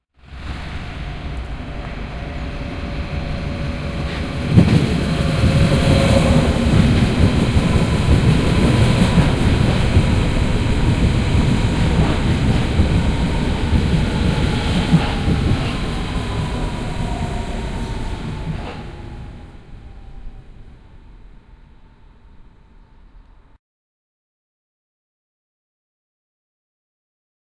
S-Bahn Berlin
Train sound Berlin S-Bahn @ Olympiastadion / Zoom H4n recorder & Soundman OKM II classic studio binaural mics